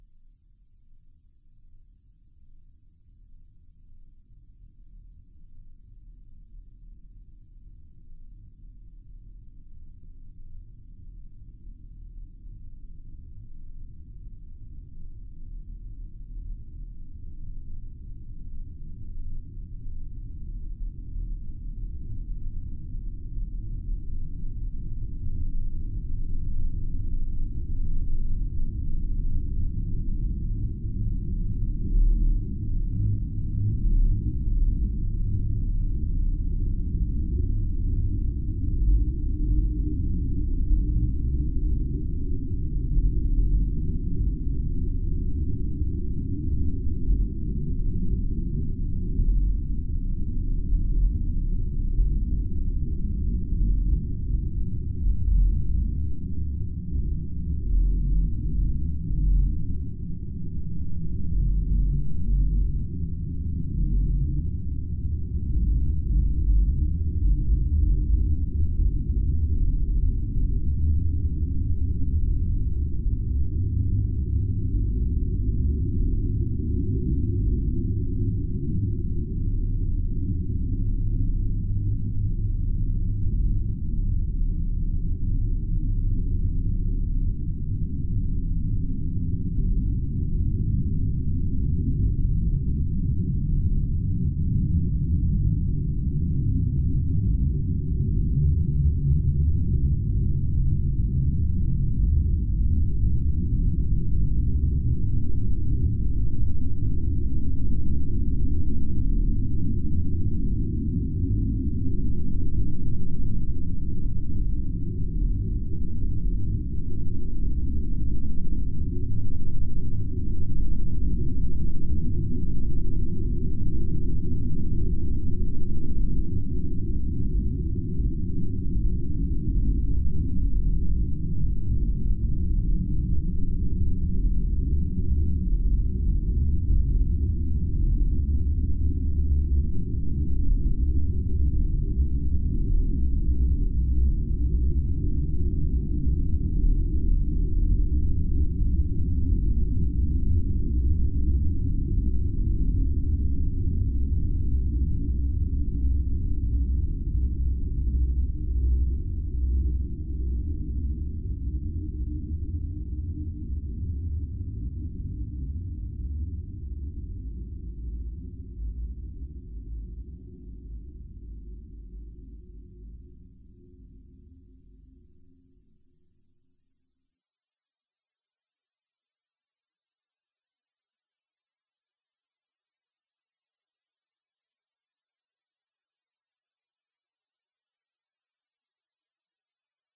Sci-Fi Horror Ambience
A sci-fi horror ambient track made from distorted mouth noises.
uneasy, mouthness, science-fiction, unease, mouth, ambience, stereo, horror, atmosphere, mouthymouth, ambient, drone, ominous, dark, atmospheric, sci-fi, scifi